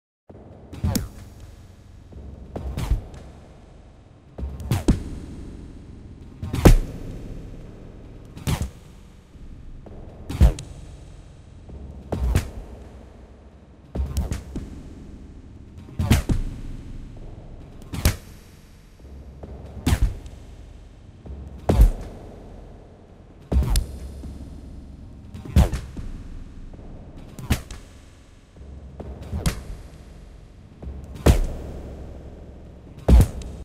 lazer tennis
futuristic tennis game
tennis, sport